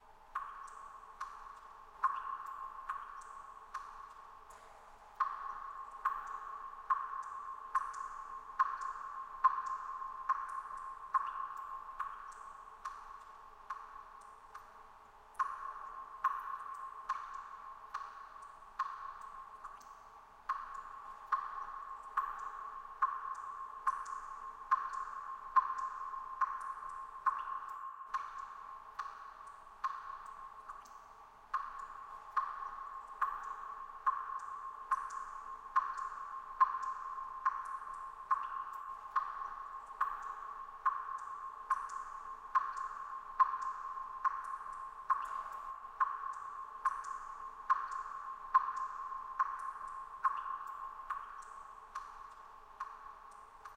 Droplets in a cave
Dripping sink faucet with some reverb and a slight delay.